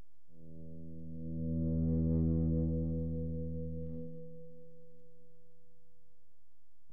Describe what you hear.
Feedback recorded from an amp with a guitar. Makes an eerie hum and can be taken strangely out of context. One of several different recordings.